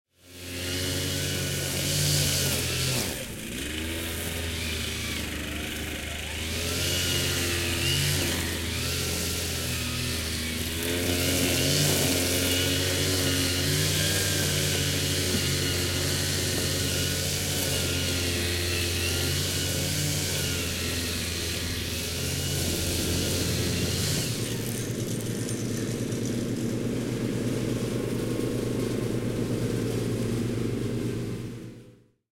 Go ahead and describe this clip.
mowning-engines in a garden